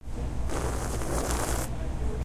sipping latte foam
The sound of sipping the foam off the top of a latte, recorded at the CoHo - a cafe at Stanford University.
aip09, foam, latte, stanford, stanford-university